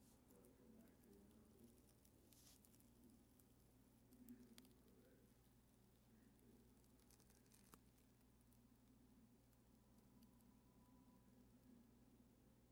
Burning Paper (Xlr)
xlr, school-project, fire, elements